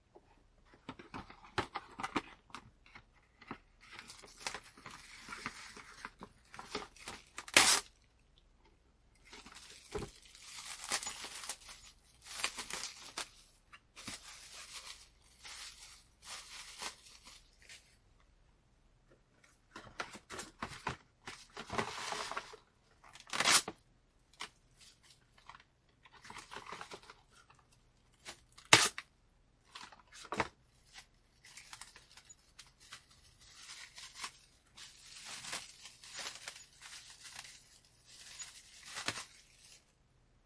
sound saran plastic wrap unroll tear wrap put down - homemade
I'm pulling saran wrap out of the box, tearing it and wrapping something with it.
crackle, butcher, wrapping, crinkle, tear, film, plastic, saran, wrap